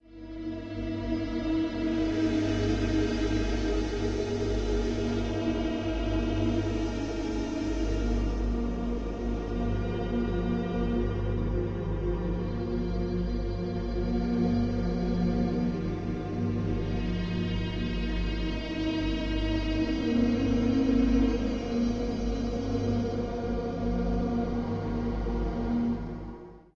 Guitar stretched to make spooky and weird soundscape. Horror or suspense background or transition.